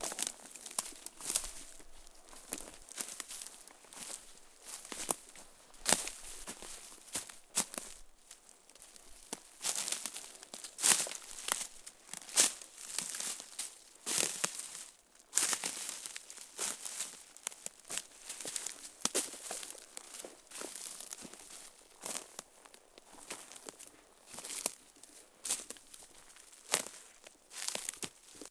pine-forest--ww2-soldier--walk--twigs
Soldier in World War II gear walks in a Finnish pine forest. Summer.
grass, soldier, forest, metal, rustle, foley, branches, field-recording